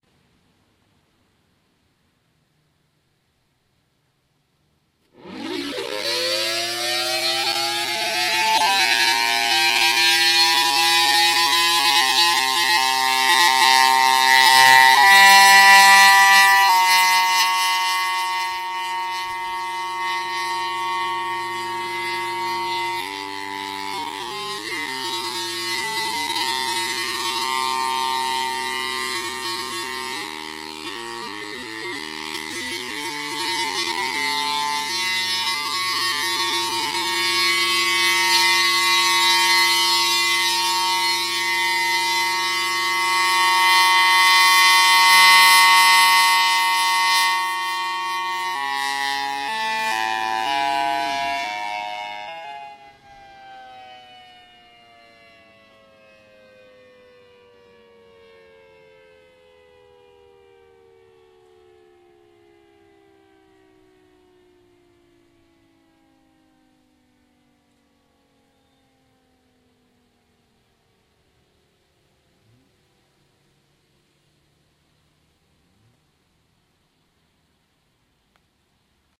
An Guitar From Grassy Swamp
hi
Antarctica, Guitar